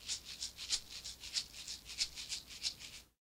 Tape Shaker 7
Lo-fi tape samples at your disposal.
lo-fi, mojomills, tape, shaker, collab-2, lofi, vintage, Jordan-Mills